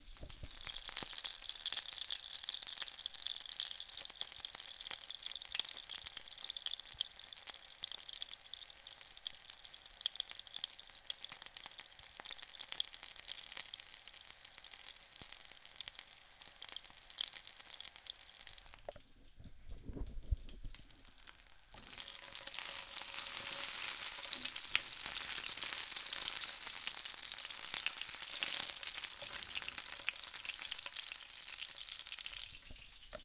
the sound of a frying pan in action, oil and all